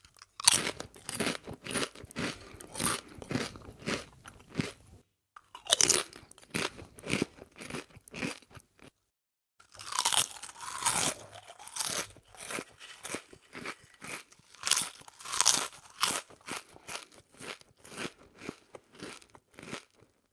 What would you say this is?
Eating Chips
Eating some potato chips/crisps for a nice crunchy crisping sound.
biting, crunch